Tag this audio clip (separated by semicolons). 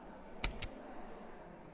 lift; campus-upf; UPF-CS13; button; elevator